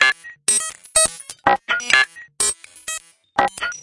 ArpingClicks 125bpm05 LoopCache AbstractPercussion
Abstract Percussion Loops made from field recorded found sounds